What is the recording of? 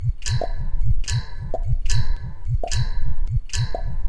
Sound of glass bottles shocking together, repeated half-speed and combined with a sound of a tongue that imitates water bubbles. (These sounds are extracted from personal recordings).